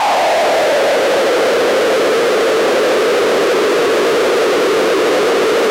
'Pink-noise' from the Mute Synth 2 with a slight sweeping effect to it. Most likely does not perfectly fit the theoretical frequency distribution of pink noise, since it is straight as it came out of the synth.
Useful raw material for noise sweeps and other effects / risers / fillers...
electronic, Mute-Synth-2, Mute-Synth-II, noise, pink-noise, sweep